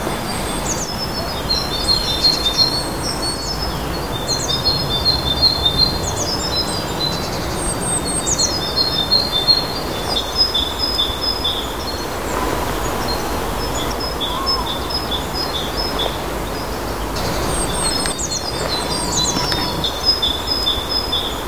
Birds twittering in a suburban forestal park. Good for looping it to a longer sound. Some background noises are included, so it will work as athmosphere, but not as an stand-alone sound. Recorded with Zoom H4N and Canon EOS 600D.
athmosphere, bird, birds, gezwitscher, nature, twitter, twittering, vgel, vogel